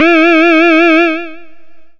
Basic pulse wave 1 E4
This sample is part of the "Basic pulse wave 1" sample pack. It is a
multisample to import into your favorite sampler. It is a basic pulse
waveform with a little LFO
on the pitch. There is a little bit of low pass filtering on the sound,
so the high frequencies are not very prominent. In the sample pack
there are 16 samples evenly spread across 5 octaves (C1 till C6). The
note in the sample name (C, E or G#) does indicate the pitch of the
sound. The sound was created with a Theremin emulation ensemble from
the user library of Reaktor. After that normalizing and fades were applied within Cubase SX.